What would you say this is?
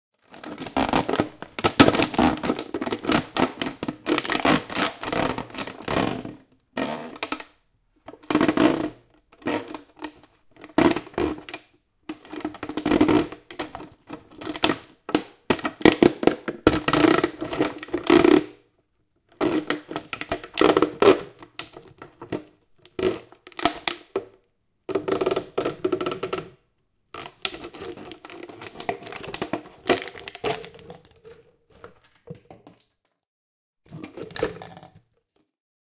Here I'm cutting up a soda bottle with a serrated knife
bottle, soda, knife